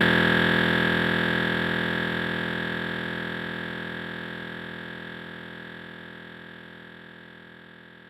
fdbck50xf49delay24ms
A 24 ms delay effect with strong feedback and applied to the sound of snapping ones fingers once.
cross, feedback, echo, synthetic, delay